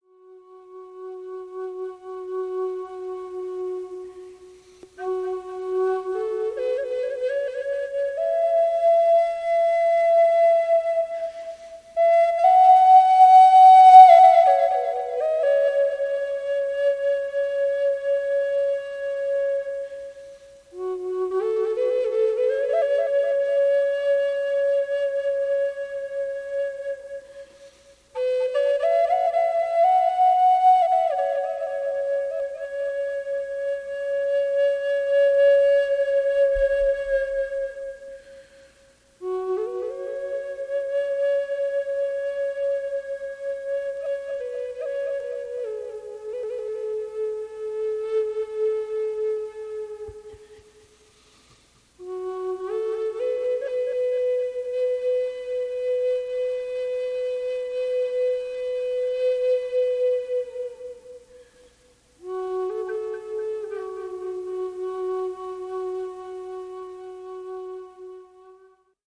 Native American Style Flute in F#4.
This is an excerpt (the last few phrases) of a piece that I played at my sister in law's funeral. I was ask to come up with a piece by her children. She was a simple person so I provided a simple piece. They liked it and I guess that is what counts. Hope you like it too.